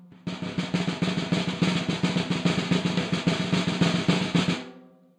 Snaresd, Snares, Mix (2)
Snare roll, completely unprocessed. Recorded with one dynamic mike over the snare, using 5A sticks.
drum-roll, snare